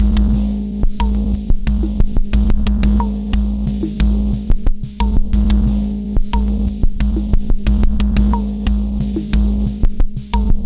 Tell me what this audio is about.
lo-fi idm 4
beat distrutti e riassemblati , degradazioni lo-fi - destroyed and reassembled beats, lo-fi degradations
dubstep, minimal, modular, hop, trip, clavia